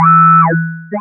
PPG 018 Acidic Bleep Tone E3
This sample is part of the "PPG
MULTISAMPLE 018 Acidic Bleep Tone" sample pack. It make me think of a
vocoded lead and/or bass sound with quite some resonance on the filter.
In the sample pack there are 16 samples evenly spread across 5 octaves
(C1 till C6). The note in the sample name (C, E or G#) does indicate
the pitch of the sound but the key on my keyboard. The sound was
created on the Waldorf PPG VSTi. After that normalising and fades where applied within Cubase SX & Wavelab.
bass, vocoded, multisample, lead, ppg